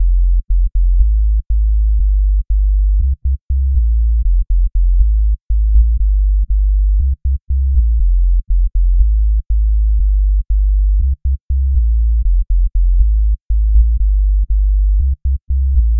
Roots rasta reggae